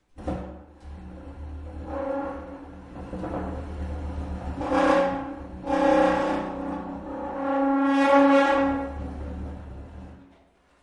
Queneau Grince Chaise Table 08
frottement grincement d'une chaise sur le sol